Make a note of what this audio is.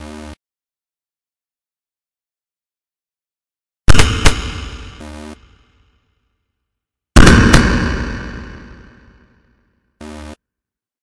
Water pouring with a noise gate, delay, and reverb.